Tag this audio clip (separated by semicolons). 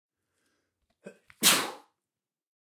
Cough; Design; Foley; Human; Man; Nose; Person; Real; Recording; Sick; Sneeze; Sound; Talking; Throat; Vocal; Voice